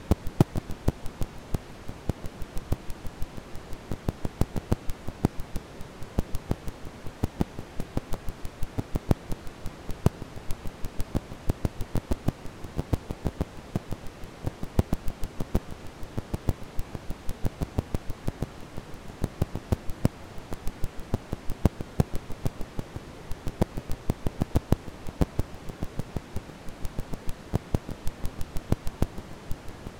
1990 33 rpm record crackle (medium wear)
A record crackle I built in Audacity. The year and rpm are in the file name.
warp, hiss, rpm, crackle, vinyl, wear, record